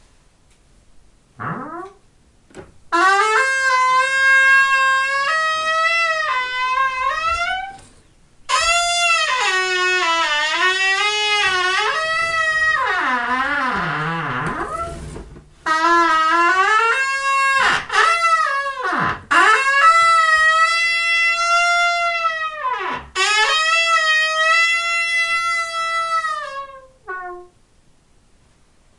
This is a recording I made of my old creaky wooden door. Made with a Zoom H4n